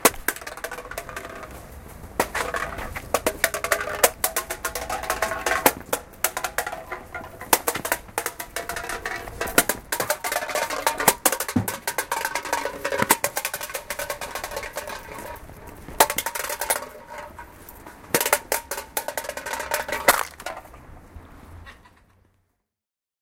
Recording of a guy kicking a beer can through the streets of Rotterdam by night